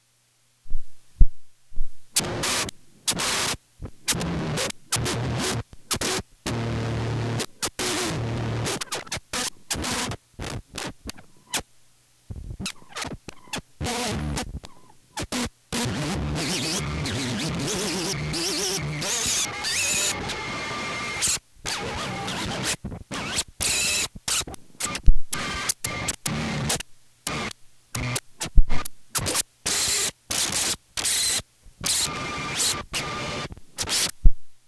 Tape noises - sqiggles, slowing down, speeding up, pausing
Tape noises created by speeding up and slowing down cassette tapes and manipulating the pause button.
mechanical, noise, music, tape